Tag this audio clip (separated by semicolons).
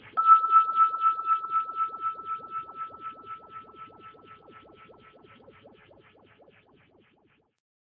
one-shot; instrument; metal